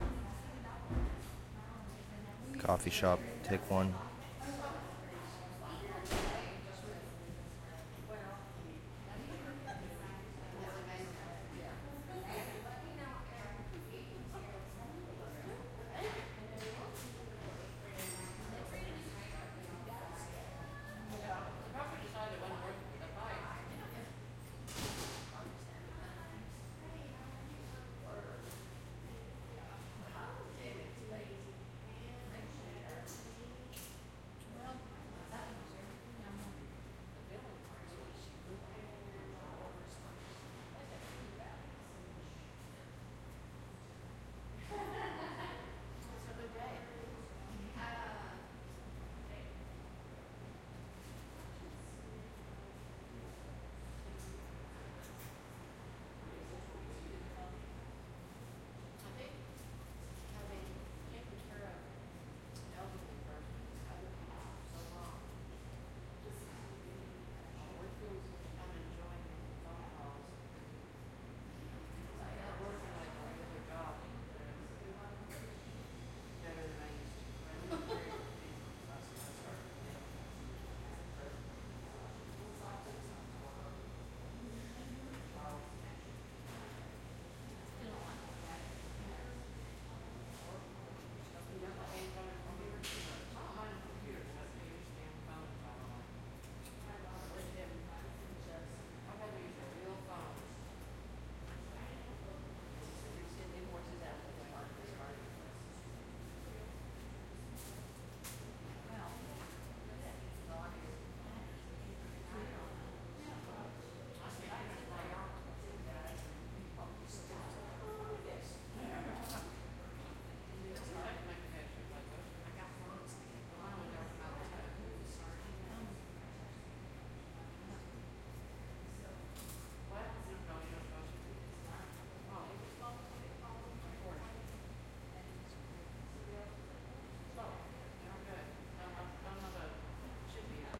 MS STEREO Coffee Shop, 6 People, low chatter, refrigerator, barista movement 1
chatter, ambience, refrigerator, 2, barista, People, customers, Coffee, 6, entering, noise, ambient, MS-STEREO, soundscape, Shop, movement, low, atmosphere, field-recording